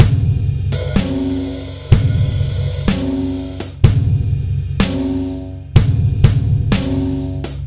beat with snare 4 4 125bpm blobby type kick fizzy hats